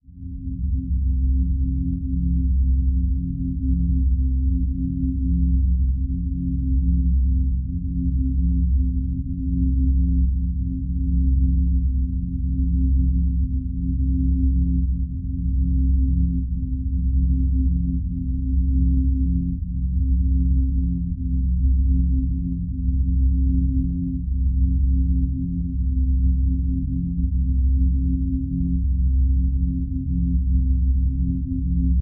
ambient loop A 001
Ambient pads, gentle drone.
drone, soft, ambiant, atmosphere, synth, ambiance, pad, ambient, ambience